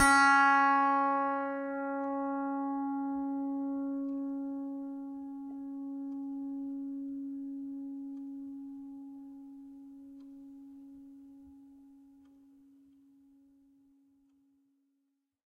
a multisample pack of piano strings played with a finger
fingered, multi, piano, strings